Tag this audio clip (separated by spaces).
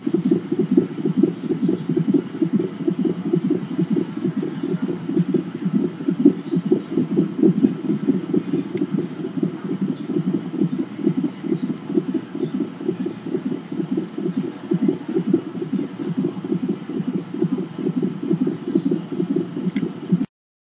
monitor Baby